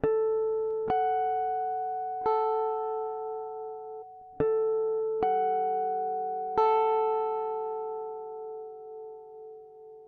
guitar harmonics2
guitar, jazzy, harmonics, licks, lines, funk, fusion, jazz, classical, apstract, pattern, acid, groovie